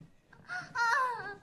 a short moan
female, feminine, human, moan, moans, pleasure, scream, woman, women